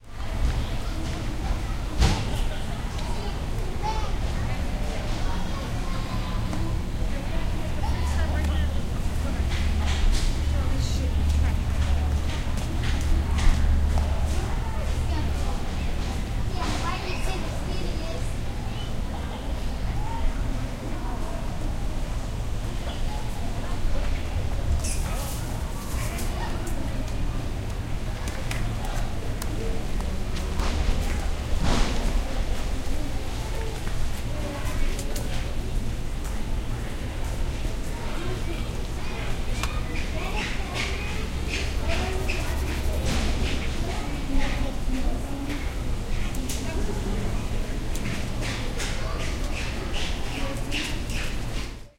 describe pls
Bathroom Ambience
GENERAL AMBIANCE OUTSIDE THE RESTROOMS. Recordings made at Barton Springs,a large naturally occurring swimming hole in Austin Texas. Stereo recording made with 2 omni lav mics (radio Shack) into a minidisc. transfered via tascam dm24 to computer for editing.
ambience, atmosphere, city, field-recording, human, pool, swimming, water